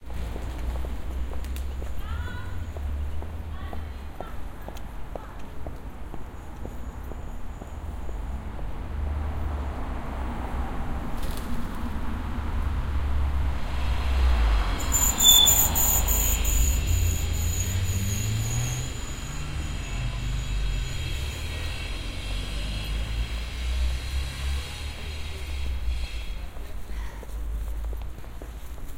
london traffic
recorded with m-audio and soundman mics near bayswater.
ambiance, city, london, traffic